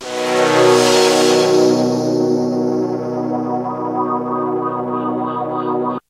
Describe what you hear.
Wobble Slicer B3
Wobble Slicer Pad